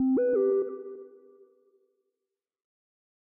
Synthesized notification sound.
button, game, hud, interface, item, message, notification, phone, pick-up, videogame